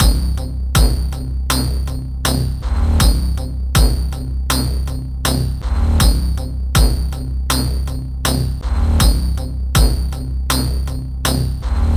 Percussive Pulse 001 Key: Cm - BPM: 80